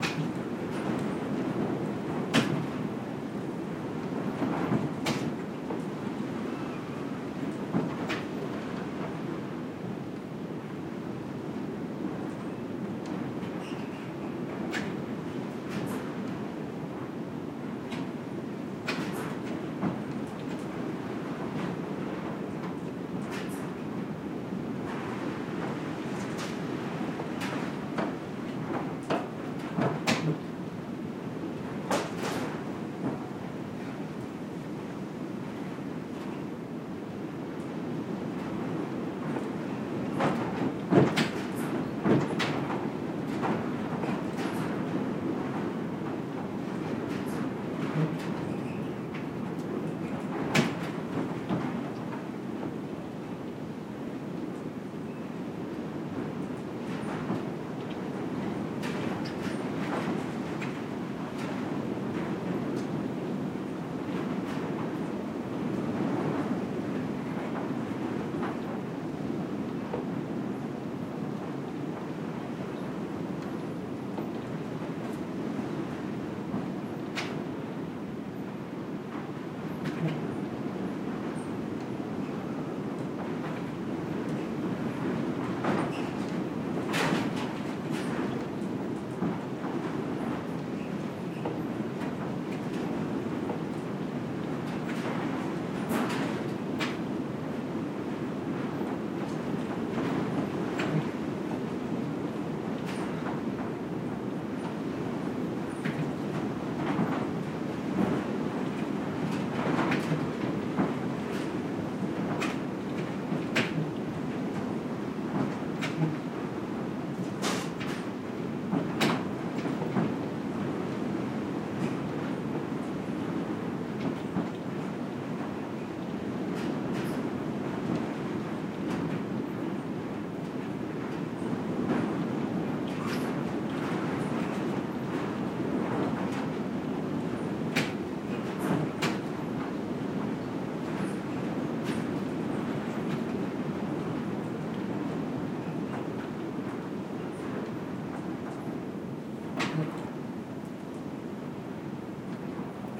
Barn Wind 003
This is a recording in a run-down old barn during a heavy windstorm. Lots of rattling shingles, doors, etc.
Recorded with: Sound Devices 702T, Sanken CS-1e